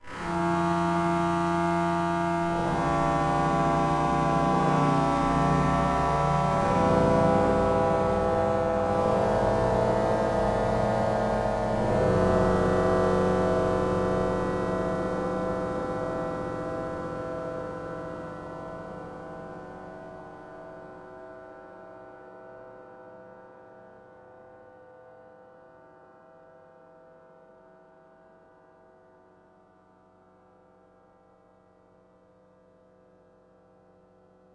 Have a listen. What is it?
Slow Aalto5
This pack comprises a series of sounds I programmed in the Aalto software synthesizer designed by Randy Jones of Madrona Labs. All the sounds are from the same patch but each have varying degrees of processing and time-stretching. The Slow Aalto sound (with no numeric suffix) is the closest to the unprocessed patch, which very roughly emulated a prepared piano.
Aalto, electronic, Madrona-Labs, prepared-piano, processed, soft-synth, time-stretched